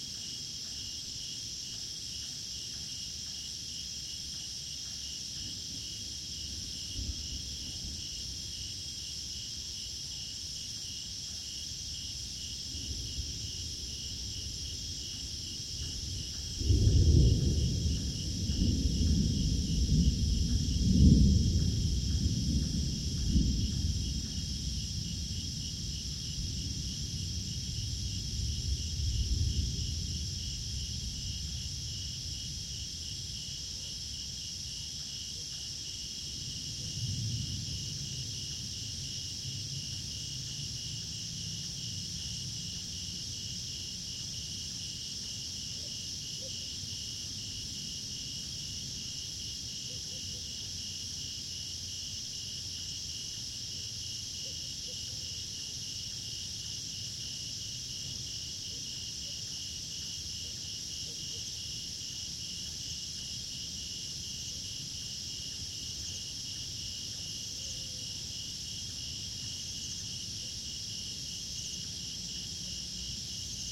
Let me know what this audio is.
140916 Jungle Amb evening Chiangmai Thailand. Thunder. Cicades. Birds(AB OlsonWing. SD664+CS3e)
ambiance, cicadas, field-recording, nature, thailand, thunder